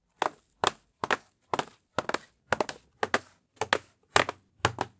Cigarette pack dropped
pack of cigarettes dropped on wood table